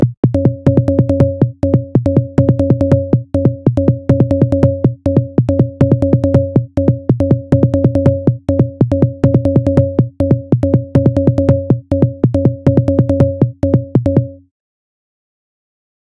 A simple loop using lmms
groovy, mistery, drums, garbage, loop, rhythm, improvised, beat, synth